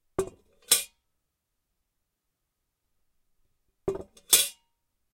Small metal bucket being set down
Setting a small metal bucket down on a surface and letting go of the handle.